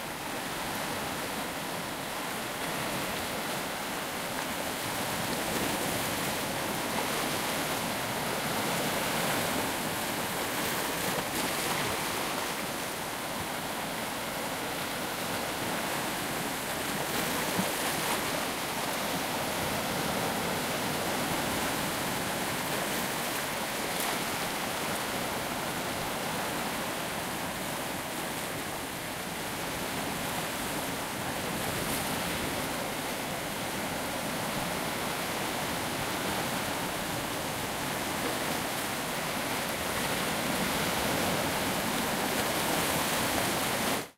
Taken with Zoom H2N, the beaches of Cyprus
shore, beach, seaside, waves, wave